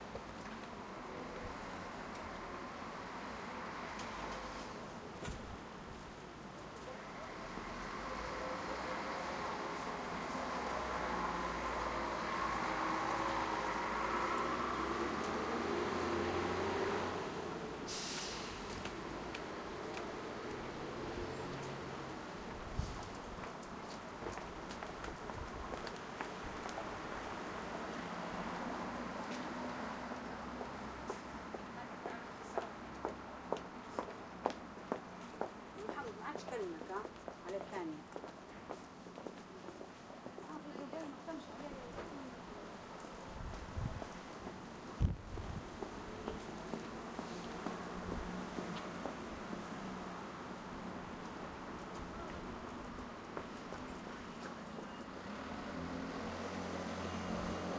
NorthSt medium
A main road in town on a normal Wednesday afternoon
people, road, town, urban